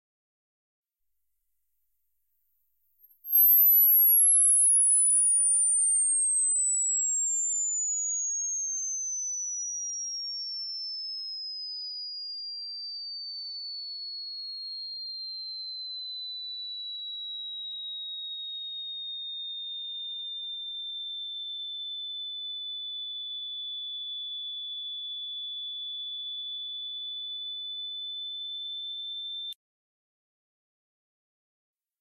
Noise Acute
Probably you could use to simulate the sound in the ear after a explosion or to put in the background to make a tension scene.
digital, horror, tension, effect, irritating, explosion, fx, thriller, noise, sci-fi